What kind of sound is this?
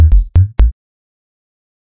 minimal-unprocessed
A minimal bass synth loop.
tech, minimal, bass, synth, loop